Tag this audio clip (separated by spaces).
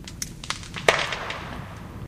edited thunder